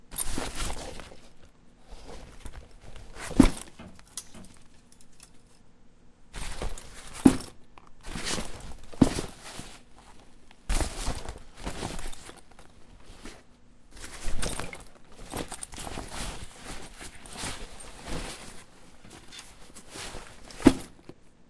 A rough backpack being lifted and dropped
rough, fold, drop, backpack, pack, thud, cloth, bag, stereo